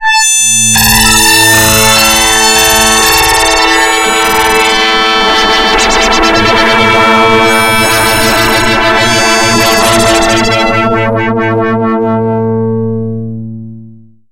Sound effect sample synthesised into Audacity using LAME plugins.